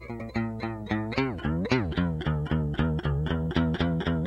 experimenting with a broken guitar string. a series of warbling, squirrelly notes. the variation in tone is caused by pulling the dead string at various strengths while plucking or striking it.
broken, experimental, guitar, notes, pluck, plucking, series, squirrelly, string, warble